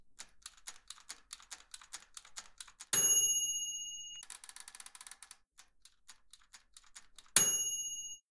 Recorded sound of a timer
ding, timer, bell